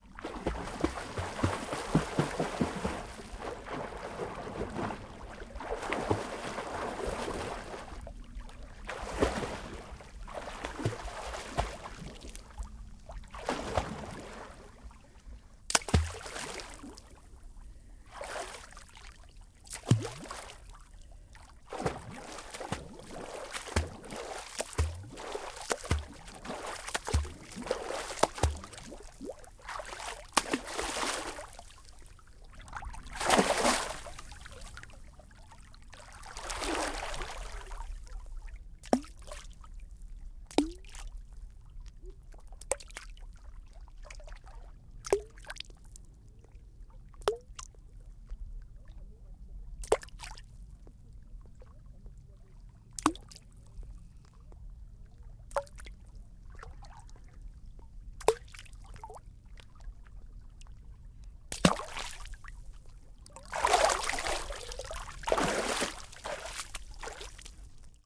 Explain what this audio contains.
lake swimming
Recording of my wife swimming in the Jezioro Dobczyckie - lake on the south of Poland during the August of 2016.
Some distant human voices, dog barking present in a few places.
mic: Rode NTG 3 in Rode Blimpc
recorder: Roland R26
gurgle, liquid, movement, pool, raw, splash, splashing, swim, swimming, vivid, water